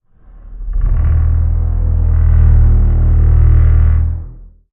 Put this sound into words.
Hell's Barn door
A big barn's door opening
big, hell, muffle, old, raspy, scrape, screetch, wood